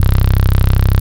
Dirty electro bass
This is used in Sylenth 1 with the Foldback distortion and some resonance fliter to it.
4x4-Records, Bass, Beat, Clap, Closed, Dance, Drum, Drums, EDM, Electric-Dance-Music, Electro, Electronic, Hi-Hats, House, J, Kick, Lee, Loop, Off-Shot-Records, Open, Snare, Stab